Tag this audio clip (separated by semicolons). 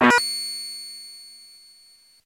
broken; drums; oneshot